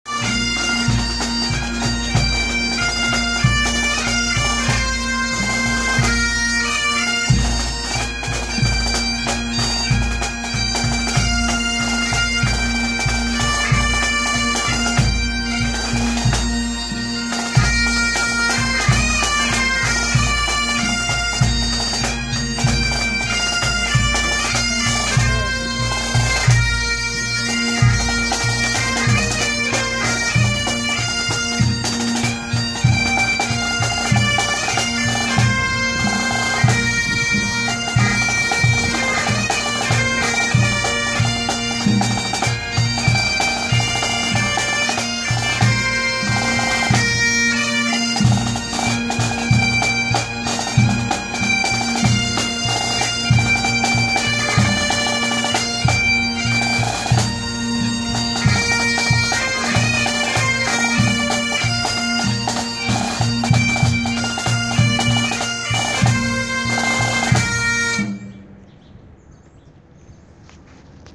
Pipes and drums playing outside a church after a funeral. No editing whatsoever. You can hear a few voices talking here and there and a little wind noise at one point, but overall it came out nice considering I recorded this on MY PHONE.
funeral, field, Bagpipes, recording